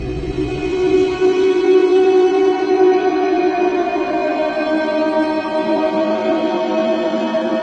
0001 mkb Chipfork glassrub02 revisited
I explored on my own and hit that.
So refer to the source license if needed.
Cheers.